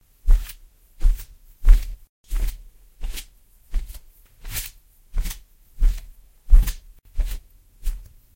Walking on a tatami
footstep, footsteps, Japan, Japanese, walk